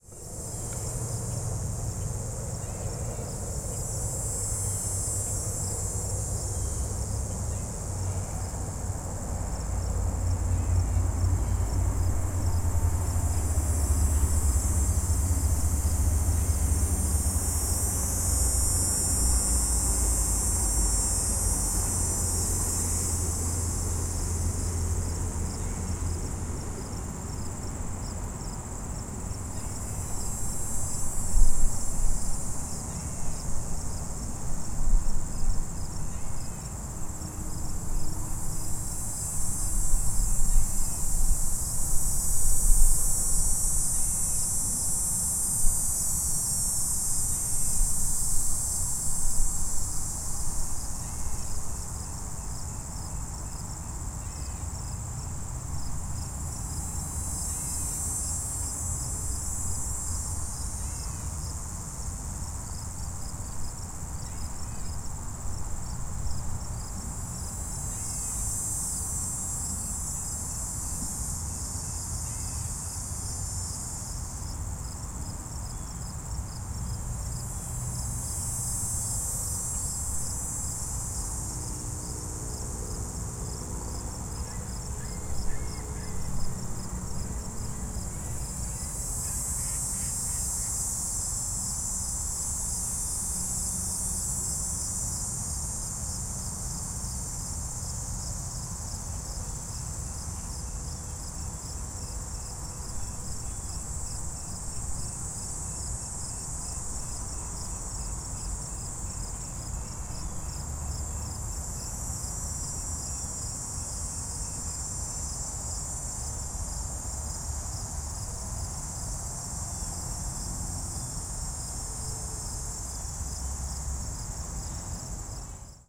Used H4n for recording
Ambience High Park Toronto Early Evening August 19, 2021
Cricket and Insect Buzzing
Background Traffic
Helicopter Above starts around 1:21
Park, Background, High, Crickets, Buzzing, Field, Helicopter, Traffic, Insect, Recording, Ambience, above